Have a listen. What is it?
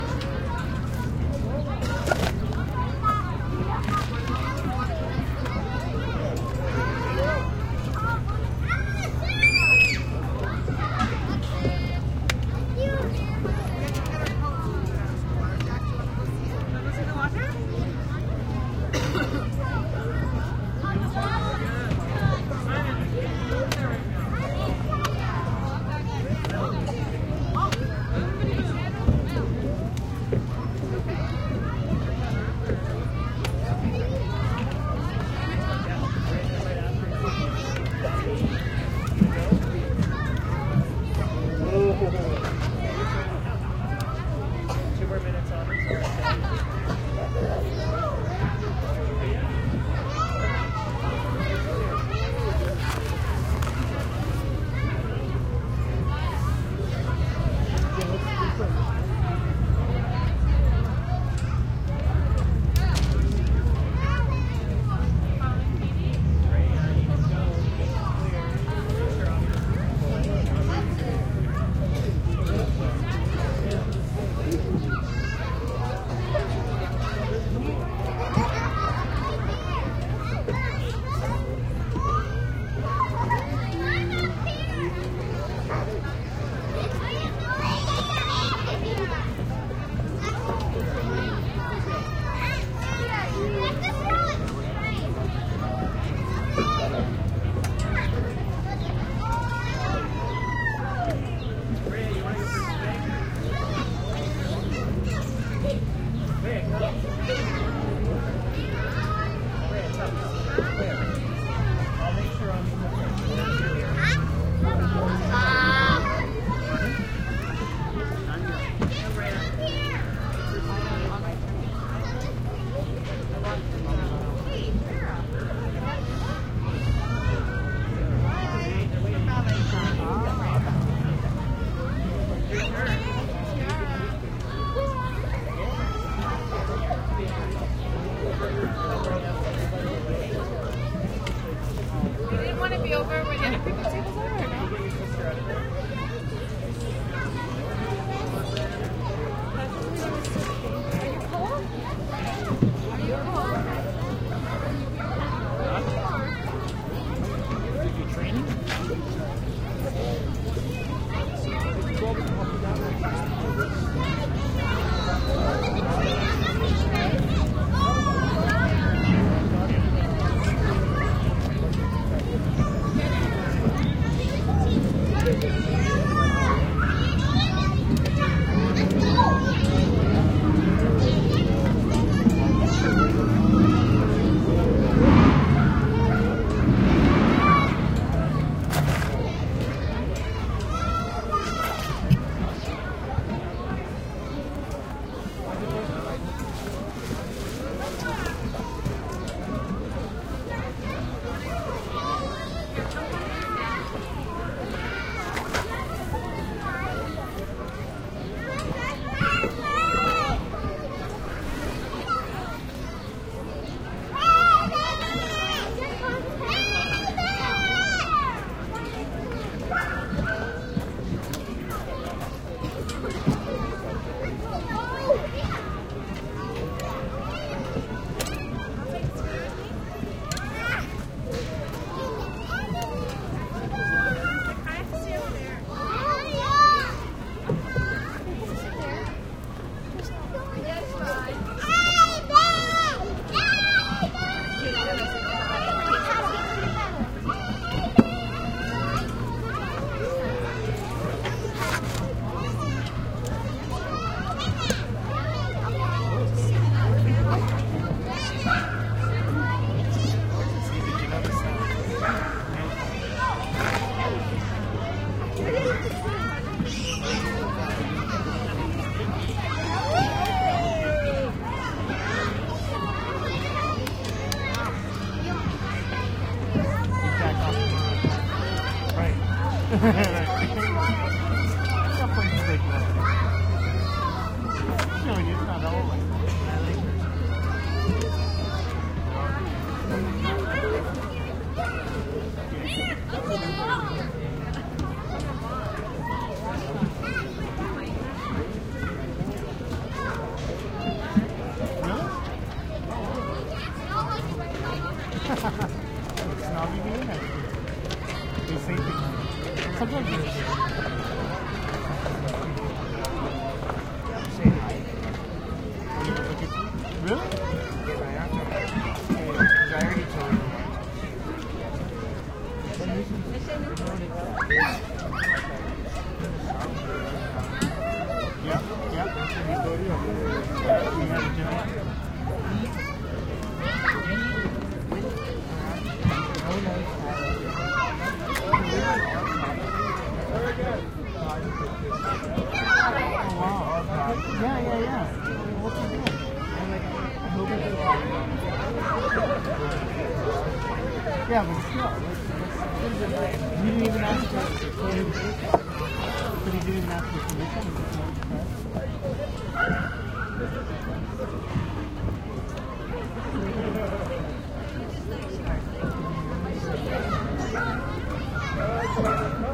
Kids Playing In Park in Springtime
recorded in Toronto Canada